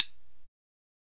single stick hit maxed
Just a single hit maximized. Zildjian drum sticks that came with Rock Band. Recorded through a Digitech RP 100. Reverb used.
domain, drum, drum-sticks, processed, public, stick, sticks